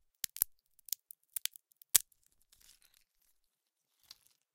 Breaking open a walnut using a metal nutcracker.
nut, shell, walnut, crack